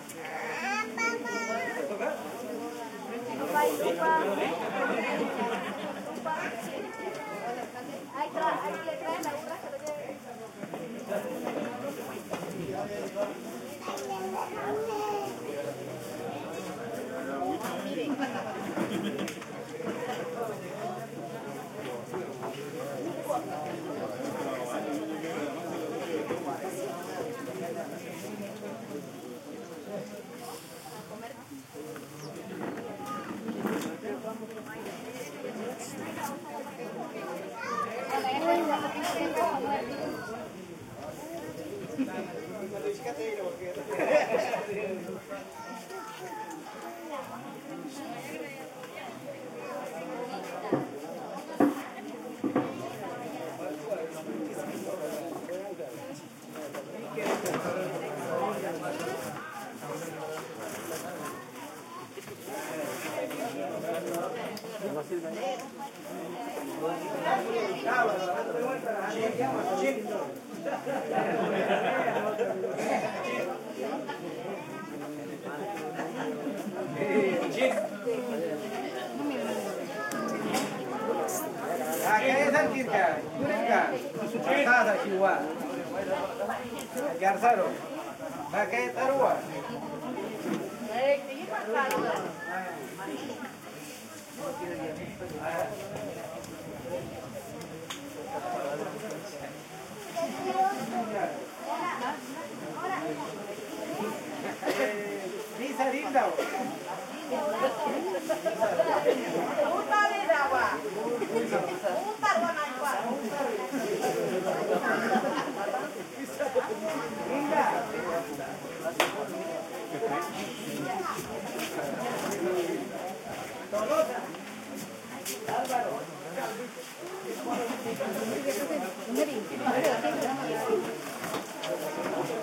indigenous village small crowd gathered under big hut for meeting families children light walla and windy trees right sometimes spanish voices Colombia 2016
crowd, hut